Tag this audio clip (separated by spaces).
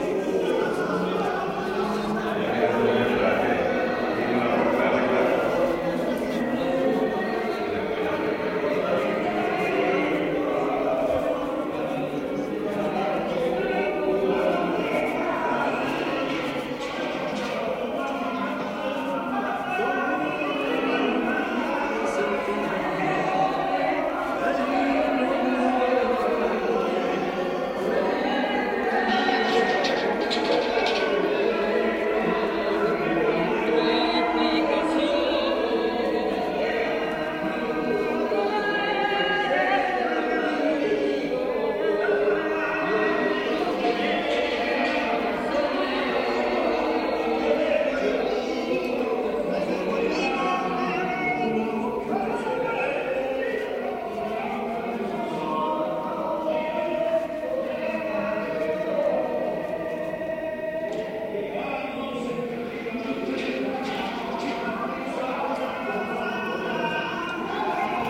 singing chaos field-recording flamenco noise vocal